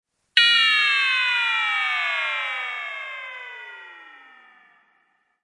A similar Ring Modulation effect to the iconic one of the John Carpenter´s movie, Christine. Recreated with a Roland JD-Xi + Protools + Roland Quad-Capture